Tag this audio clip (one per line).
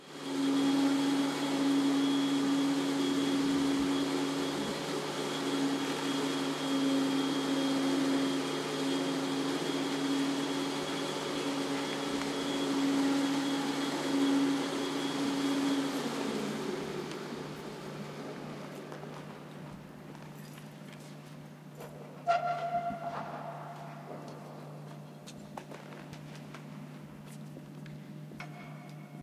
noise
machinery